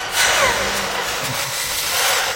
Chant Machine Sound
Recorded as part of my mus152 class with my friends Andrew and Kevin. On a zoom h6 an sm57, an at2020 and a lousy amp.
horror, proceed, spooky, evil, sounds, sinister, terrifying, ghost, thrill, dark, group, creepy, anxious, terror, nightmare, people, voice, noise, scary, Chant, mus152, suspense, phantom, machine, fear, hell, garcia, sac, haunted